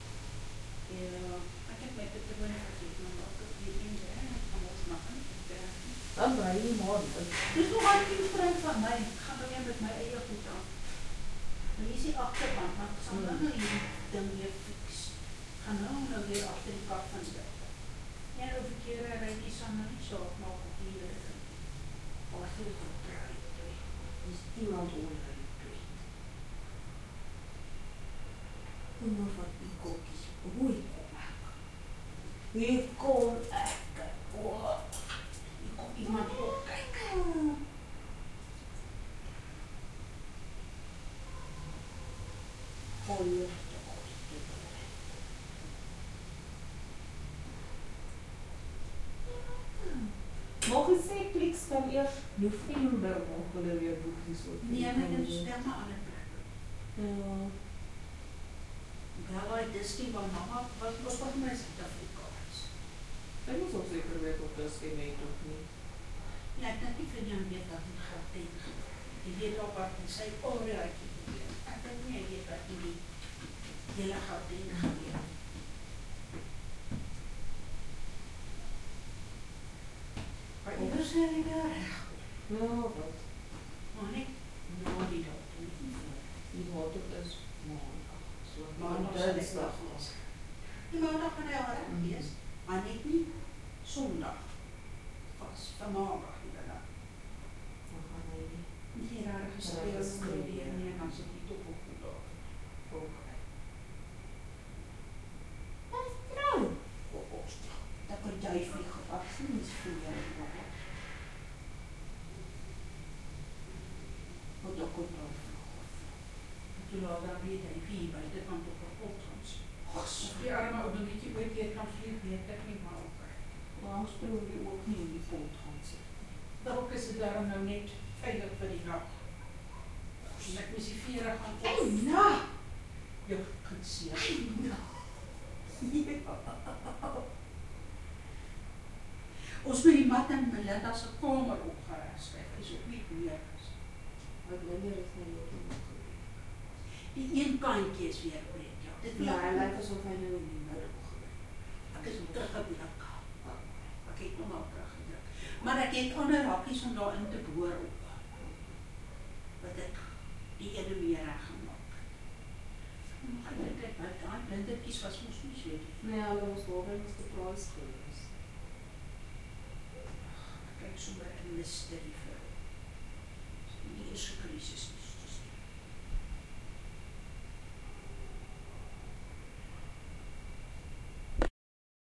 Living Room Ambients With Voices
Living Room Ambience recorded with A ZOOM H6 for a The Open Window Sound Design Project, includes Afrikaans Voices on a Windy night.
Environment, Ambience, Residential, OWI